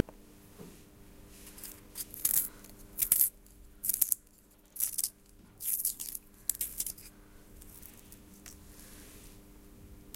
mysound Regenboog Aiman
Sounds from objects that are beloved to the participant pupils at the Regenboog school, Sint-Jans-Molenbeek in Brussels, Belgium. The source of the sounds has to be guessed.
Jans, Brussels, Regenboog, Sint, Molenbeek, mySound, Belgium